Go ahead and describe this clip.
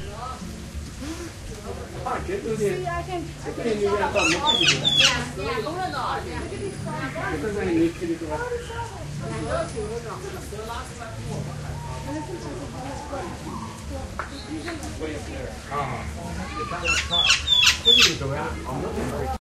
zoo birdmonkeypeople
Walking through the Miami Metro Zoo with Olympus DS-40 and Sony ECMDS70P. Birds, monkey and people noises.